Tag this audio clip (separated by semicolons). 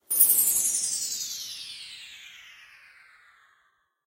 clinking; ching; clink; clank; metallic